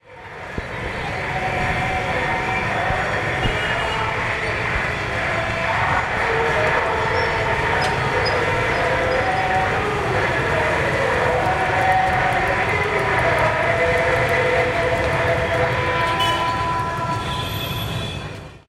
Jaipur, in the hearth of India was burning of live some day last August...people, music, multitude, cars, Shiva.